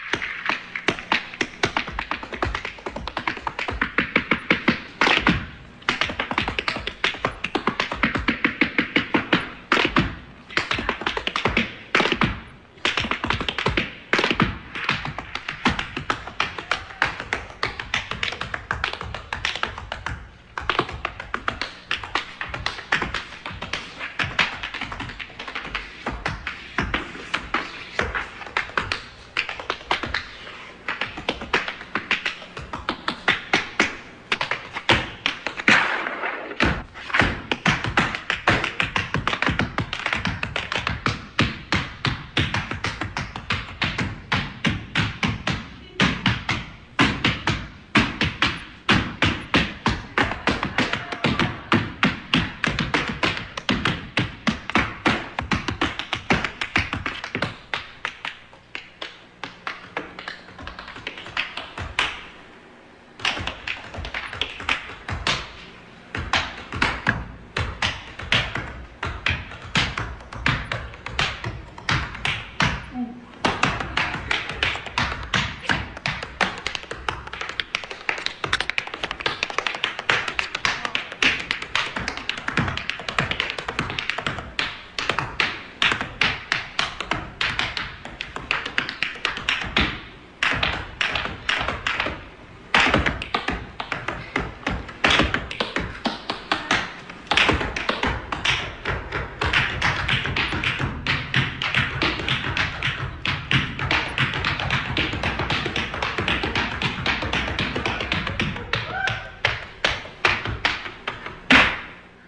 Single tap dancer, dancing on stage, medium distance, reverb in room. old sounding Int.